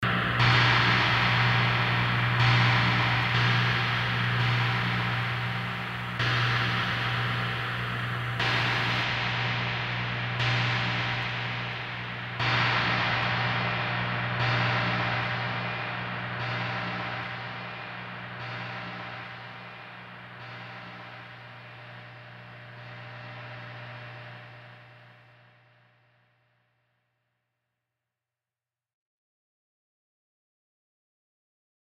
Suspense metallic sound
creepy, Suspense-metalic-sound, suspense, haunted, thrill, terror